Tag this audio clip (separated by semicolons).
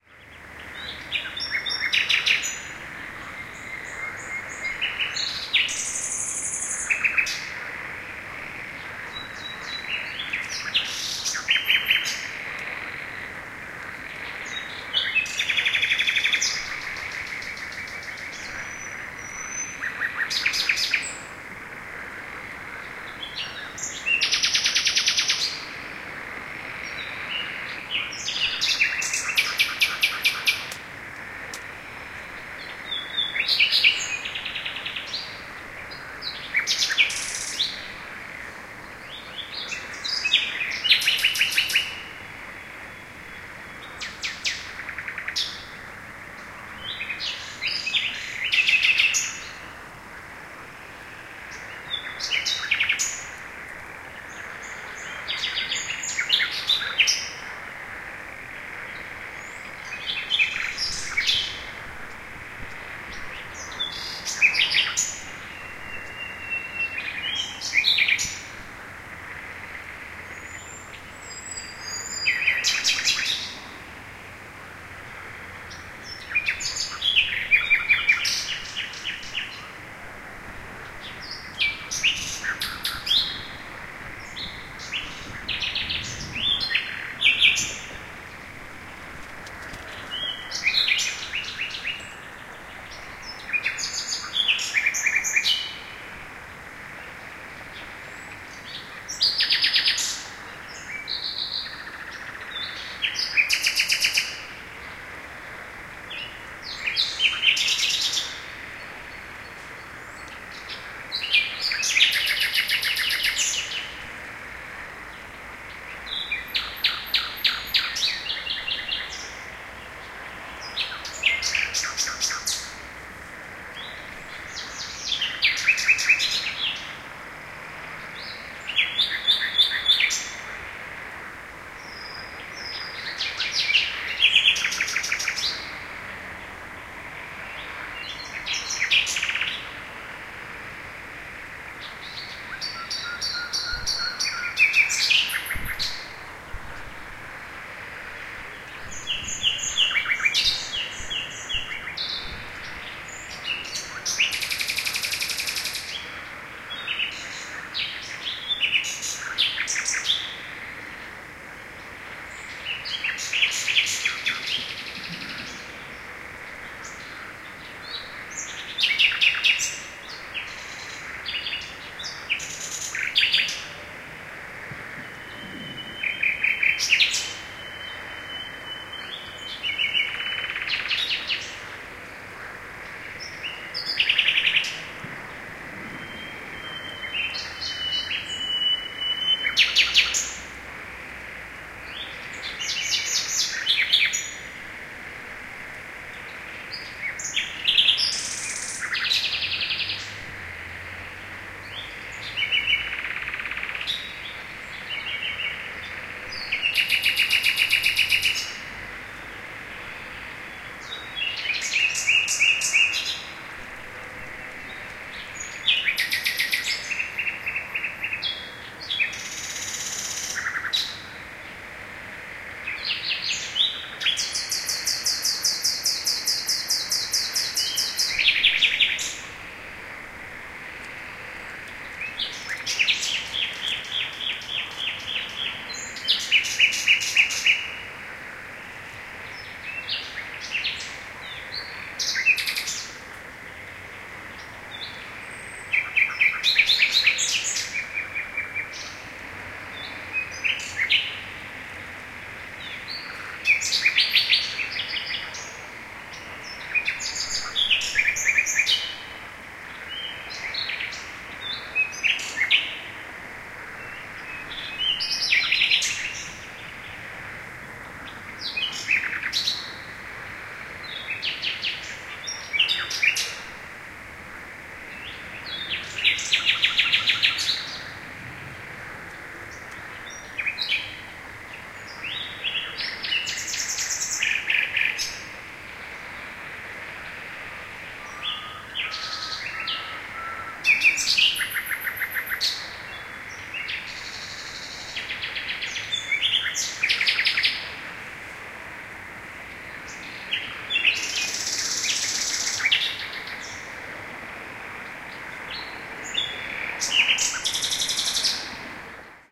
birds; frogs; night; summer